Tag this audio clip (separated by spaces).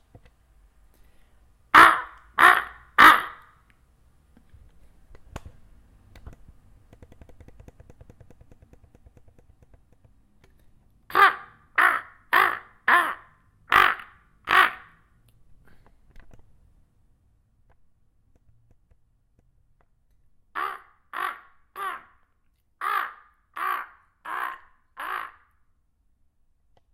nature raven